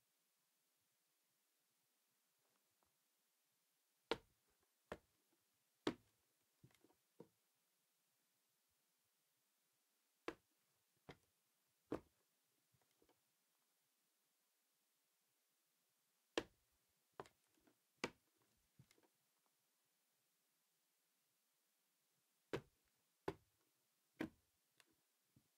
This was recored in a controlled sound studio.